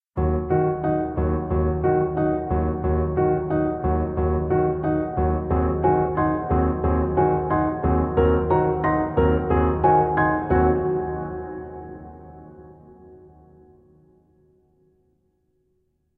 piano mess about
more messing about on a lovely grand piano
dsjgriffin, sting, arpeggio, chord, piano, free, instrument